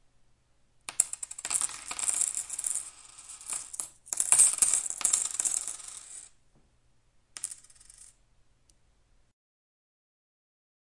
The sound of coins falling onto a wooden table. All falling at different times so it creates lots of separate sounds rather than just one loud sound.
change, coin, coins, dropping, indoor-recording, money